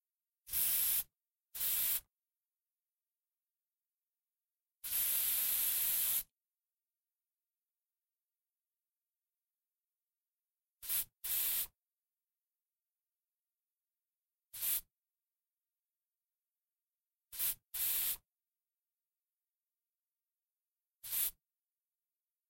Stereo Recording of an Aerosol Can
Air, Paint, Paint-Can